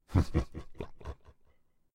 Demon Laugh 1
A more subdued demon laugh. More of a demon chuckle, really.
creature, laugh, demon, devil, sinister, horror, scary, creepy, demonic, evil, spooky, monster